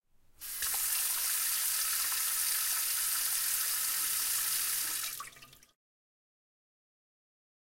water running from a tap - shorter version